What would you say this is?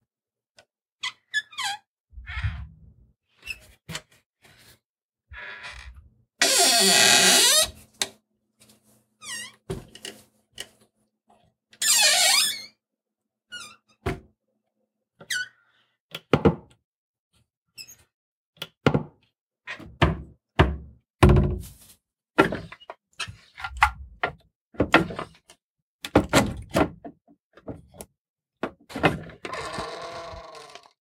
Cottage Wooden Doors - Assorted Squeaks and Creaks
Recorded in a vacation cottage with a Zoom h4 in Okanagan, BC, Canada.
cabin, closing, clunk, creak, door, rattle, squeak, squeaky, squeek, wooden